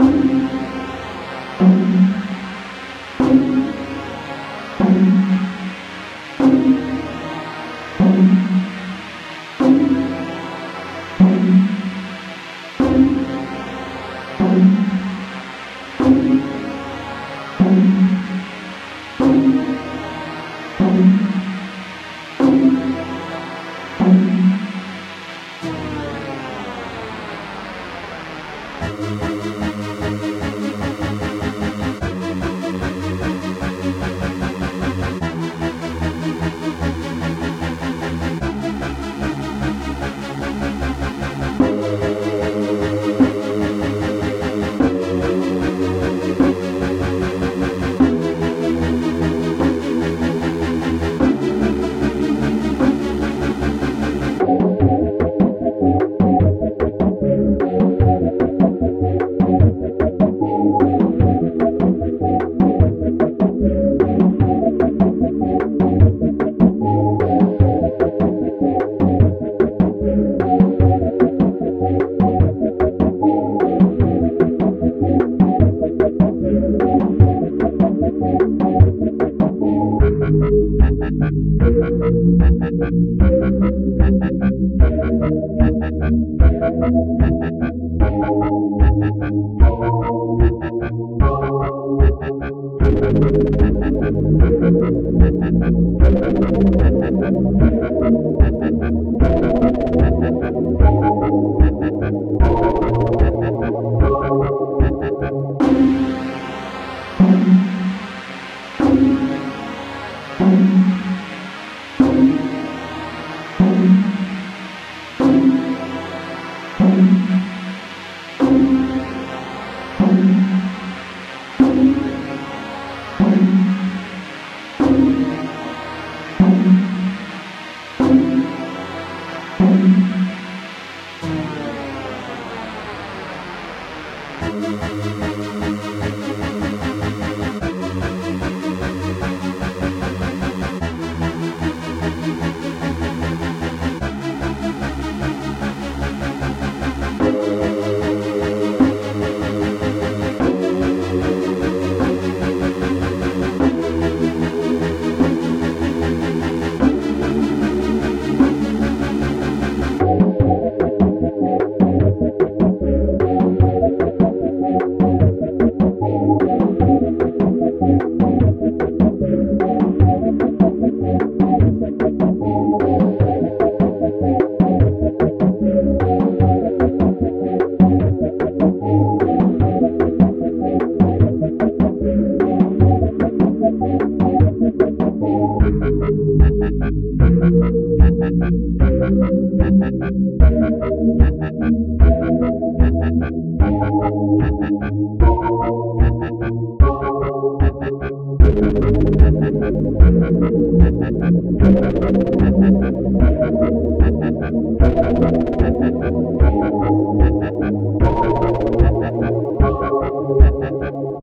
Dark loop
You can use this loop for any of your needs. Enjoy. Created in JummBox/BeepBox.
music, tension, background, film, sample, game, atmosphere, drama, melody, movie, loop, ambient, soundtrack, dramatic, ambience, suspense, dark